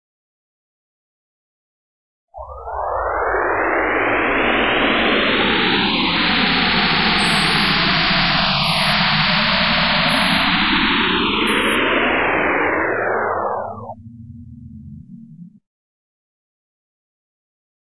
I took a picture of Pencilmation's Profile Photo face that I out and put it into the VirtualANS. To me, it sounds like it's building up to something and sounds like the THX logo, but then fails to build up to it at the end as if a sci-fi weapon failed to fire. 03/03/2003 (Russo-Georgian Sci-Fi) were end. 08/08/2008 (Russo-Georgian War)